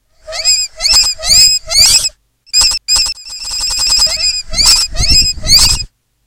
this bird is named Chillewippa Transientus
artificial, bird, chillewipp, chirp, nature, ornitology, song